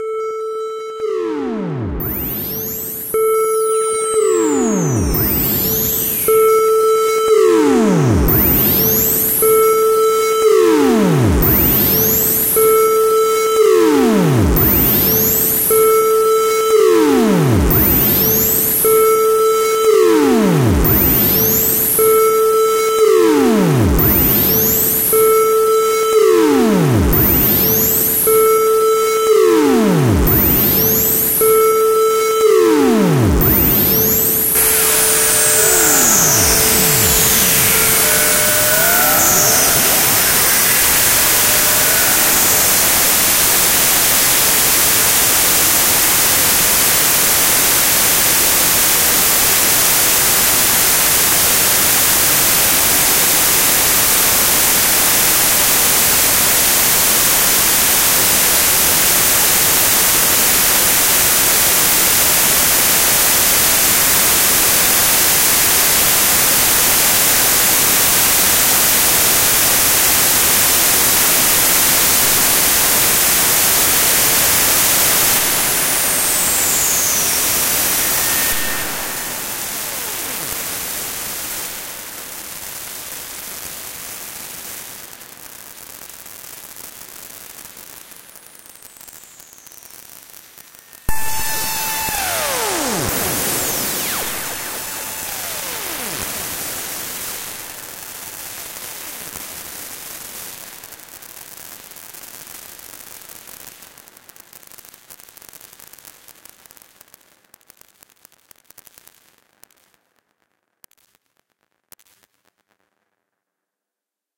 Ascending and descending sine wave notes, echoed until the point of distortion.
distortion, echo, noise